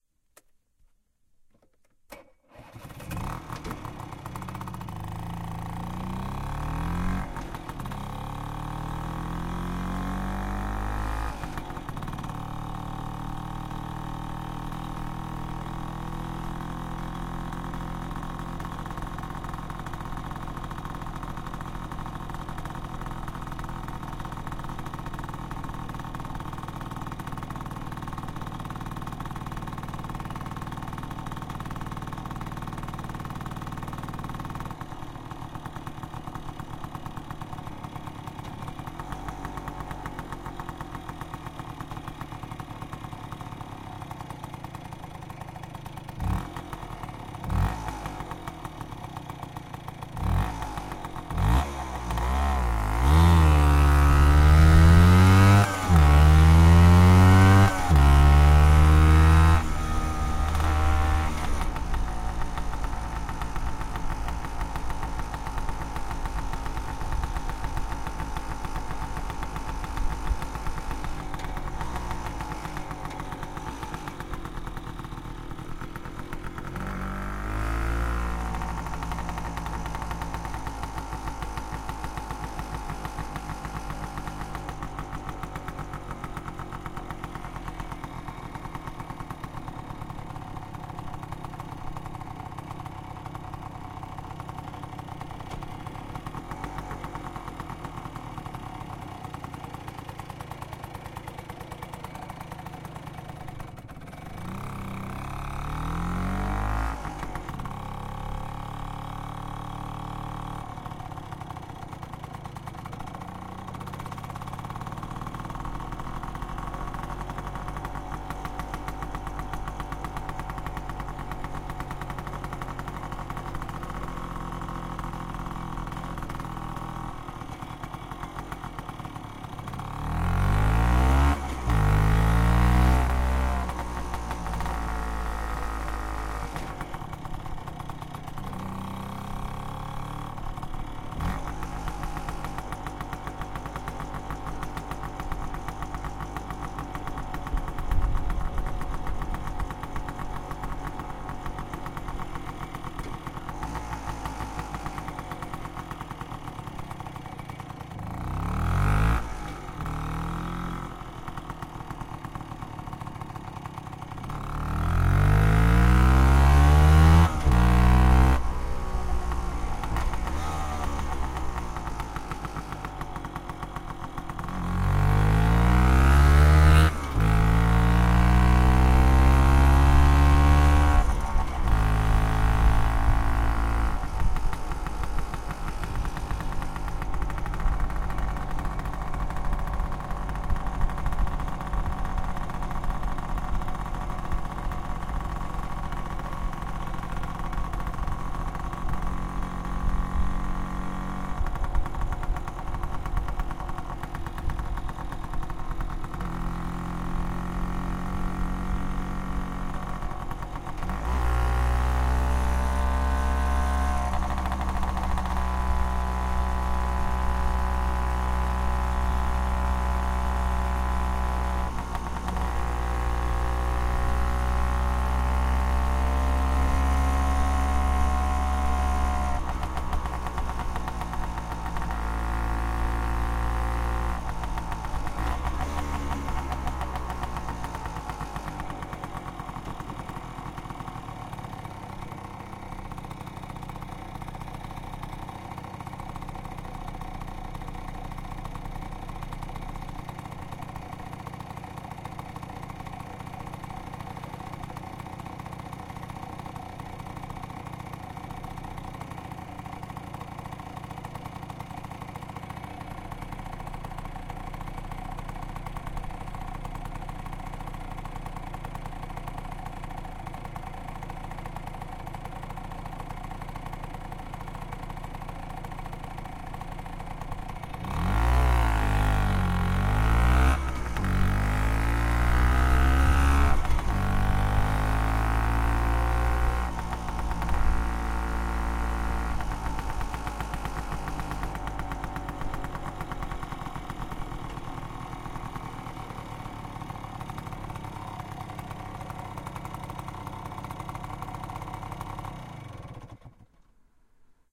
Recorded during riding by attaching a Zoom H4n at my left leg. The xy-Microphone pointed vertically direction to the seat/engine, so no spectacular stereo-sound, but different sound timbre on L/R. The exhaust had a rusted hole so it's not the original Vespa-Sound but more rough. Different styles of driving. Including starter and switching off. No Sound editing was made, so maybe you like to filter away low frequencies (some little wind was behind the shield).